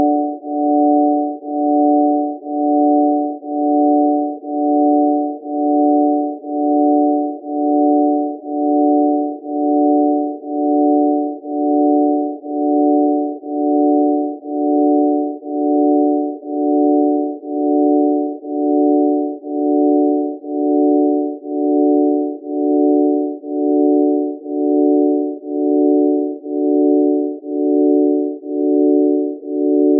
Long multisamples of a sine wave synthesized organ with some rich overtones, great singly or in chords for rich digital organ sounds.
drone, multisample, organ, pad, synth